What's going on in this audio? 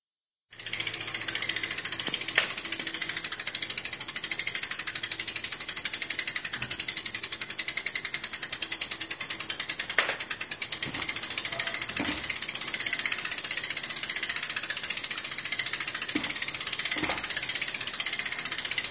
wheel bicycle
bike, bicycle, wheel